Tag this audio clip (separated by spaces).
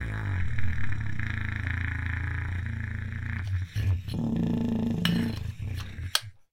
davood extended low subtone technique trumpet